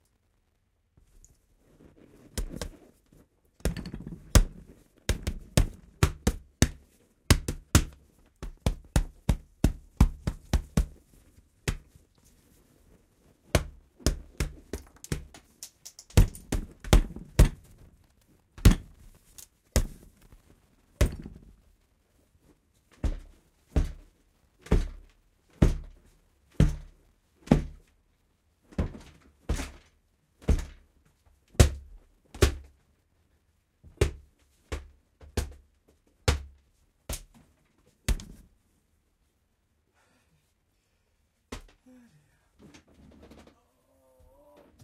Cinematic - Punches - Hits
Cinematic, Foley, Punches, Stereo